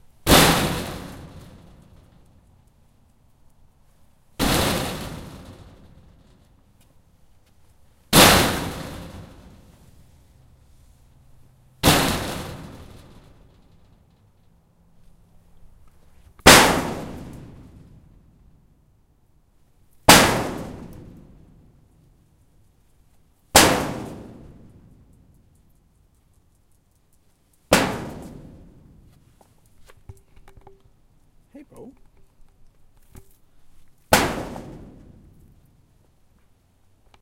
Shed Kicking
The sound of me kicking a shed behind our house.
Recorded with the built-in mics on a Zoom-H4N.
bang, metal, loud, kick, shed, zoom-h4n, explosive